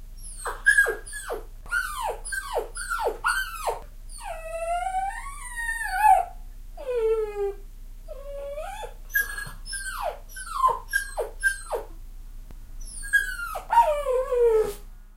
This version is shorter (about 15 seconds long) and primarily edited to remove the loudest whining and the distortion due to clipping.